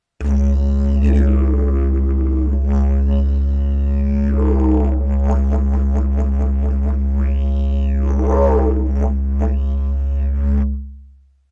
didge filler 01
Rhythmic recording, Didgeridu (tuned in C). Useful for world music or trance mixes. Recorded with Zoom H2n and external Sennheiser Mic.